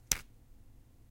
Ice cubes broken apart
Sounds of an ice cube being crushed in a glass.
click, clink, crunch, drink, glass, ice, ice-cube, ice-cube-tray